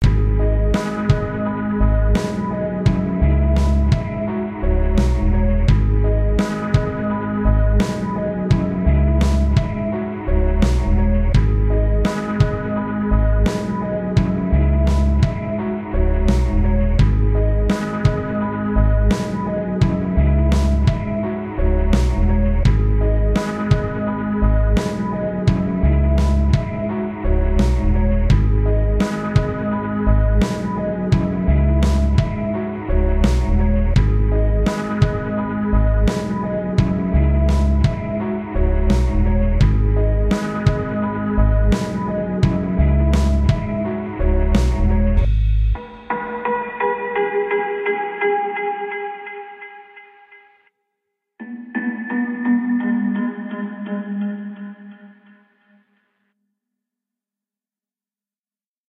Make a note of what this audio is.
Echoes sample 5
soundtrack, sample, music